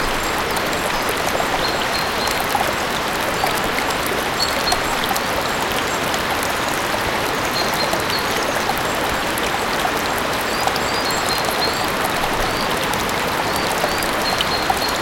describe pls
snow thawing4
Short loop recorded with zoom h1. Two water whitenoises with birds in background.
ambient, birds, loop, nature, water, white-noise, zoom-h1